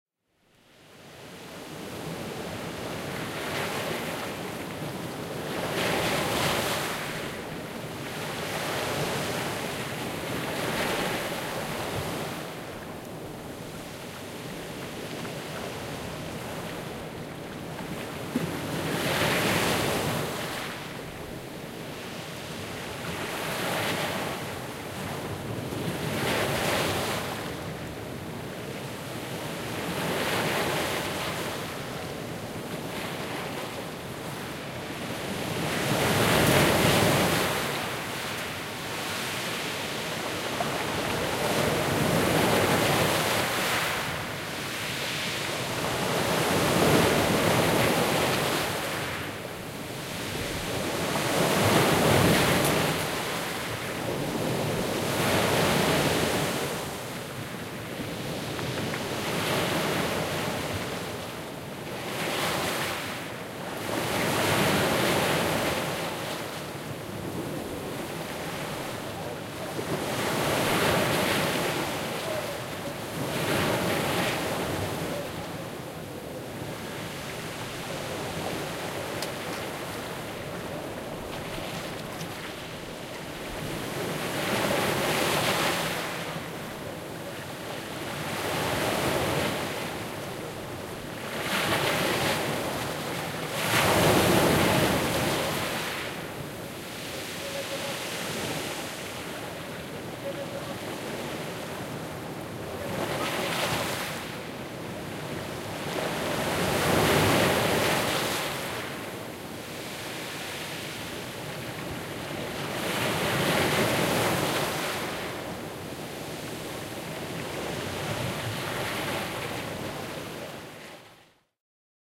waves beach small-surf saranda
Field recording of a beach in Saranda 2010. Small to medium surf, waves lapping on beach, ambiance. Recorded with Zoom H4n.